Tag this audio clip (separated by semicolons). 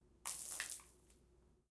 blood; floor; liquid; spill; splat; splatter; water; wet